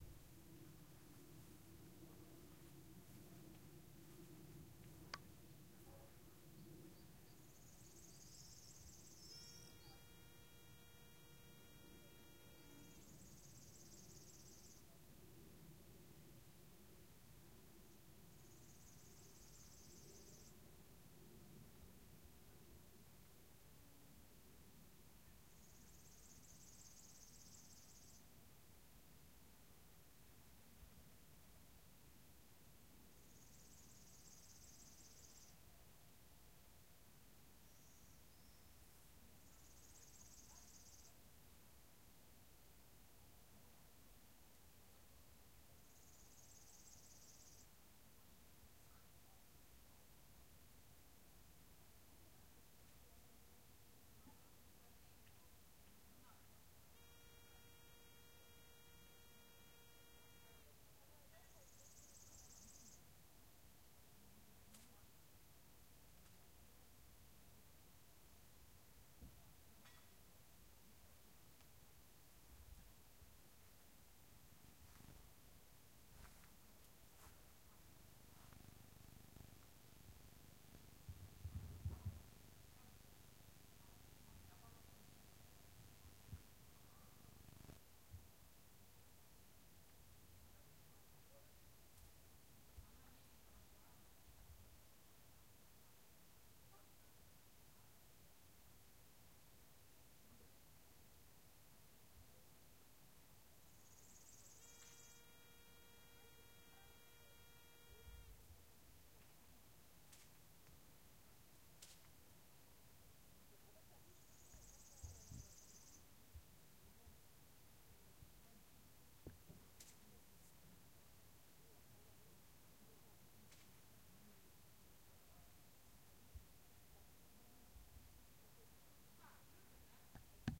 Very quiet village evening ambience 3, B747 plane on 10000m
This sound recorded in a small village near Yaroslavl in Russia. Very quiet evening ambience, swifts are flying nearby, also you can hear a B747 plane flying on 10000m (checked via FlightRadar24).
ambience, ambient, birds, evening, field-recording, raw